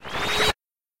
Spacey Quick 1
sci fi flourish - a quicky
laser, science-fiction, teleport, sci-fi, alien